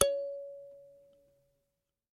A cheap kalimba recorded through a condenser mic and a tube pre-amp (lo-cut ~80Hz).
Tuning is way far from perfect.
ethnic, african, thumb-piano, thumb, piano, instrument, kalimba